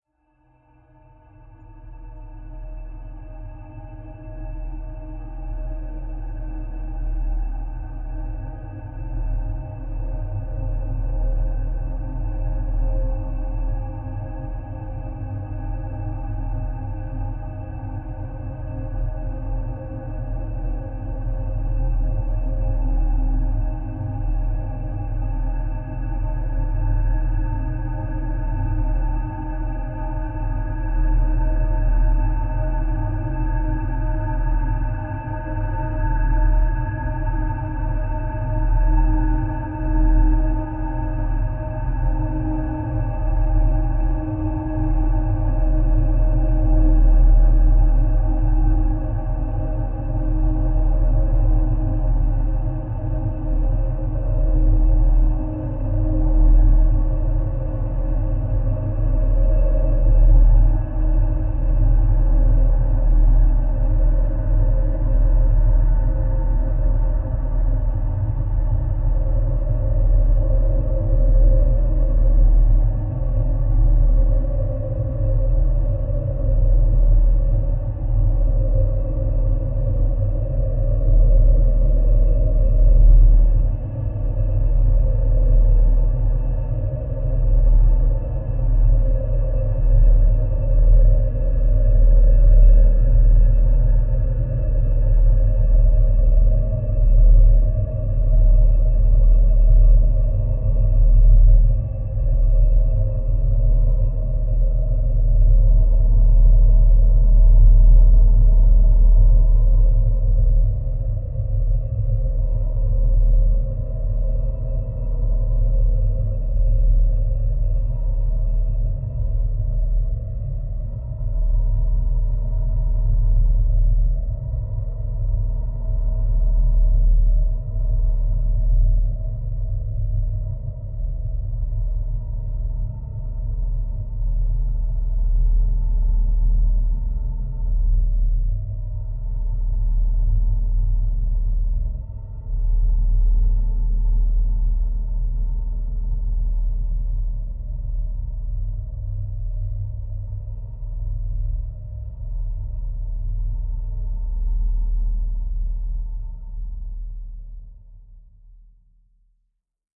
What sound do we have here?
Melodrone multisample 08 - Dawning Resonances - E3
This sample is part of the “Melodrone multisample 08 - Dawning Resonances” sample pack. A lowender, quite dark but soft at the same time. Lot's of low resonances. The pack consists of 7 samples which form a multisample to load into your favorite sampler. The key of the sample is in the name of the sample. These Melodrone multisamples are long samples that can be used without using any looping. They are in fact playable melodic drones. They were created using several audio processing techniques on diverse synth sounds: pitch shifting & bending, delays, reverbs and especially convolution.
atmosphere, drone, ambient, multisample